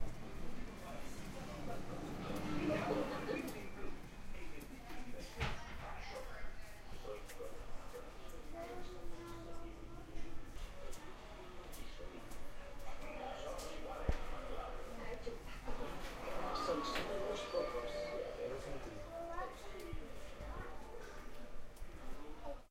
radio in room
Ambiguous radio sounds mixed with people heard when passing hotel room
radio, door, past, walking, noise